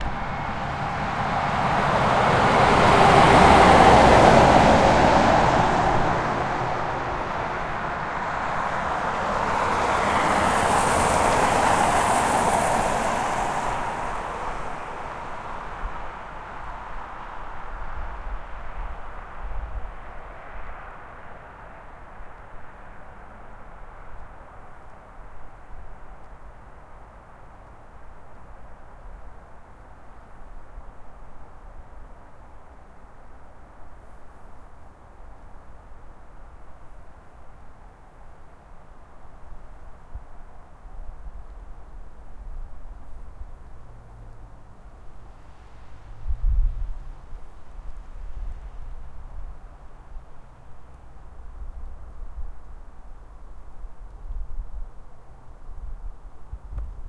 A truck with missing beginning in the sound an two cars at the road some kilometer from our house. Recorded with Zoom H4.